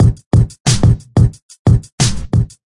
90 Atomik standard drums 08
fresh bangin drums-good for lofi hiphop